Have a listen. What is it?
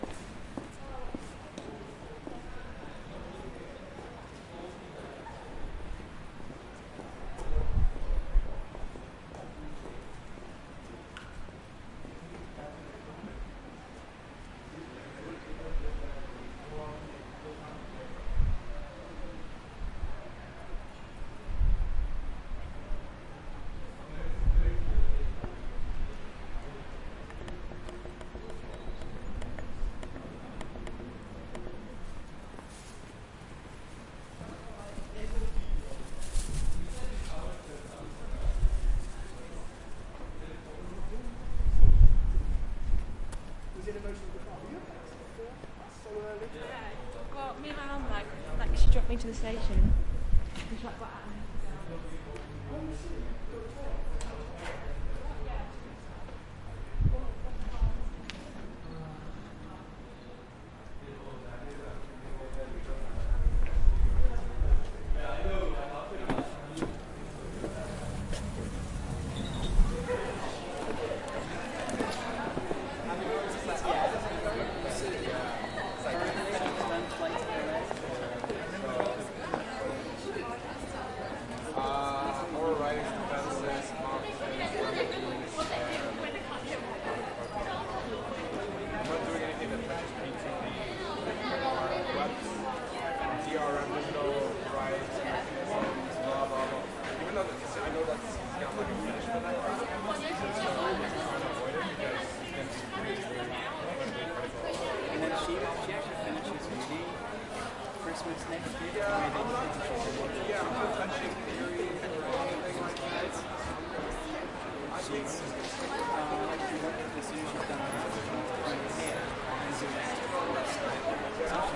University of Exeter, January 2014. Starts external, outside The Atrium. Very windy, trees thrashing about, students walking past, talking and laughing. At 1:00 moves inside through revolving door. Internal of The Atrium - large glass ceilinged area full of students and staff.